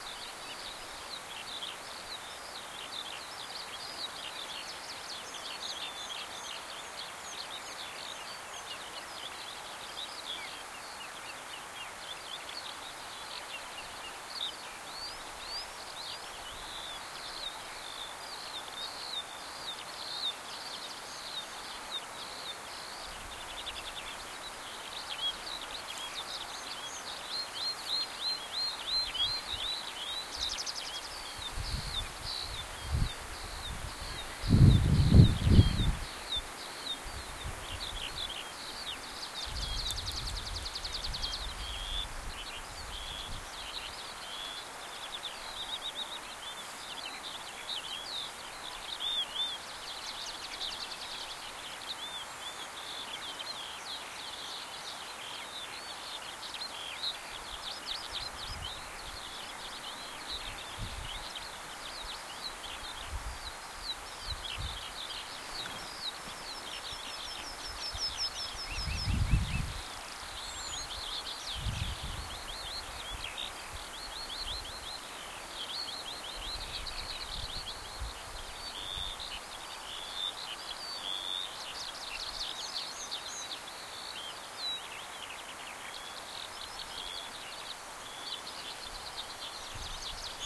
This clip was recorded on the 28.05.2006 at a field near Marbaek / Denmark,using the Soundman OKM II and a Sharp IM-DR 420 MD recorder. There is some wind disturbing the otherwise fine recording of these skylarks, a true sound of summer.
binaural, birdsong, denmark, field-recording, skylark